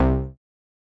Synth Bass 004
A collection of Samples, sampled from the Nord Lead.
bass, lead, nord, synth